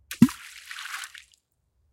Water + rock = plop! Recording chain: Rode NT4 (stereo mic) - Sound Devices MixPre (mic preamp) - Edirol R09 (digital recorder).